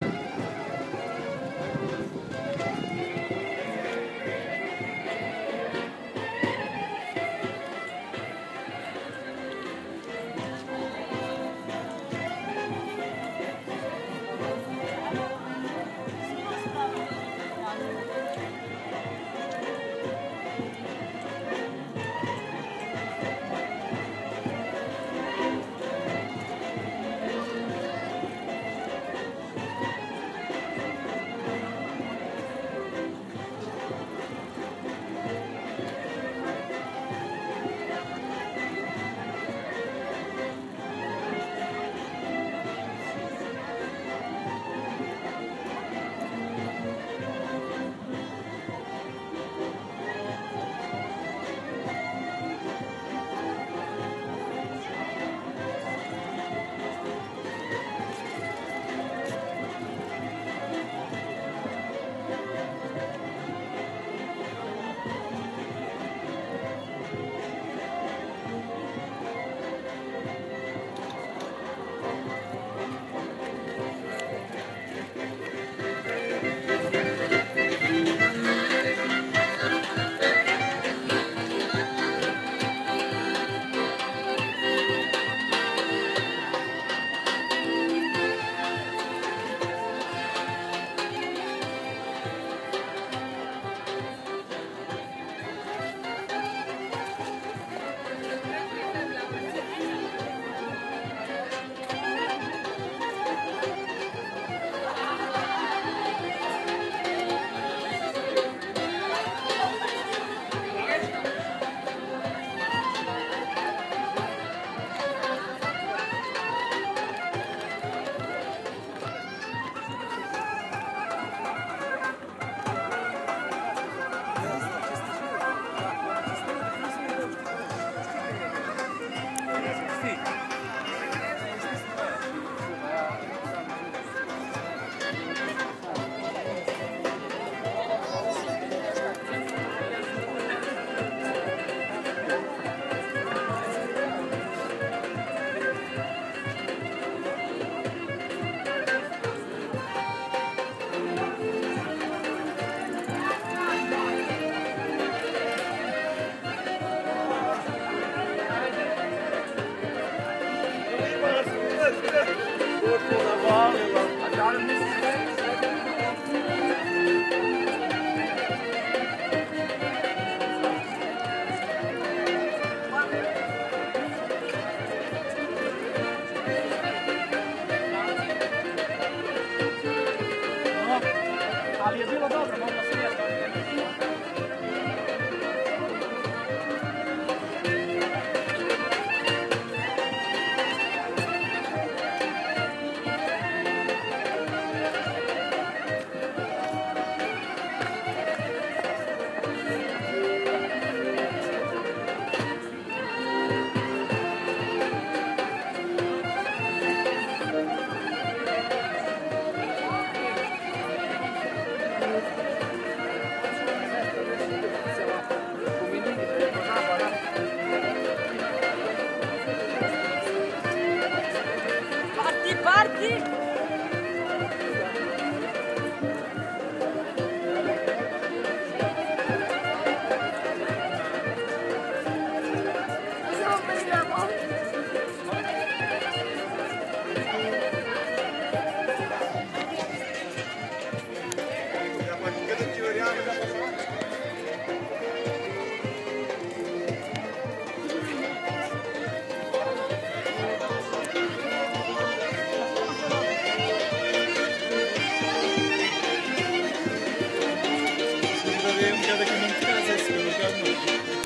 ljubljana musicians2
Musicians playing in the street in Ljubljana. September 2012.
Field-recording, Ljubljana, music, musicians